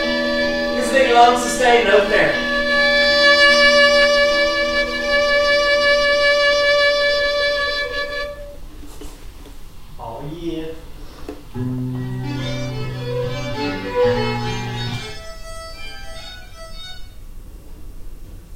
niall sustain note me mickmon
Recording violin in a small hall. Playback of the track we were working on can be hear near the end.
long, sustain, fiddle, reverb, violin